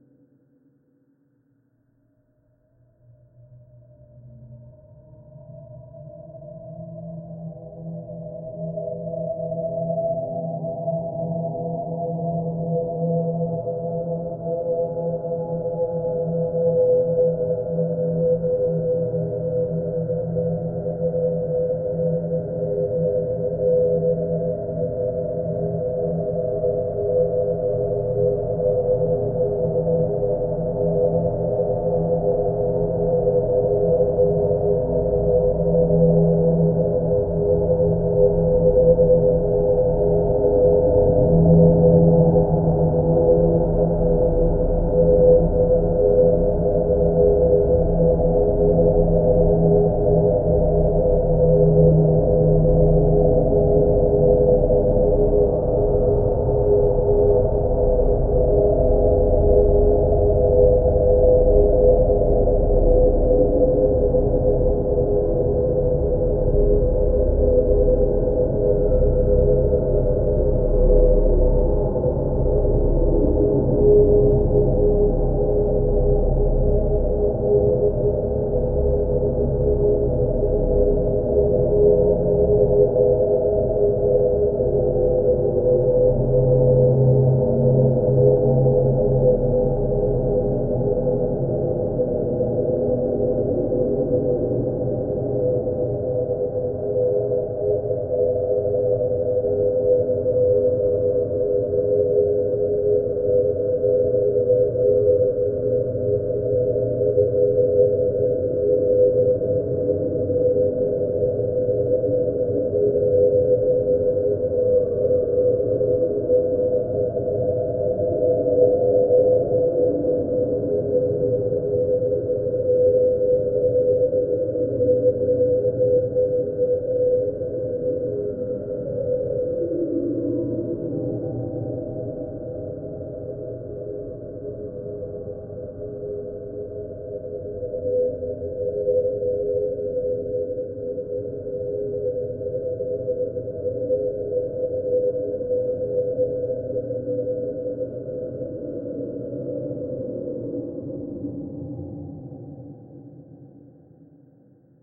LAYERS 009 - UltraFreakScapeDrone - E0
LAYERS 009 - UltraFreakScapeDrone is an extensive multisample package containing 97 samples covering C0 till C8. The key name is included in the sample name. The sound of UltraFreakScapeDrone is already in the name: a long (over 2 minutes!) slowly evolving ambient drone pad with a lot of movement suitable for freaky horror movies that can be played as a PAD sound in your favourite sampler. It was created using NIKontakt 3 within Cubase and a lot of convolution (Voxengo's Pristine Space is my favourite) as well as some reverb from u-he: Uhbik-A.
drone, horror, evolving, multisample, pad